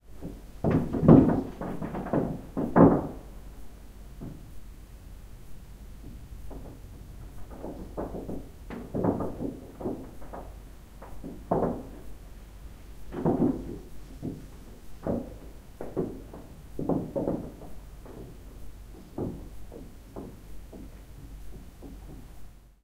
Strange sound of the chimney getting cleaned from within my flat.
Recorded with Zoom H2. Edited with Audacity.